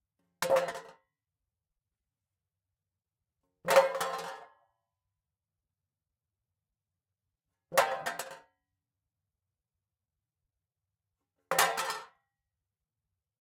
A baking dish dropped on floor.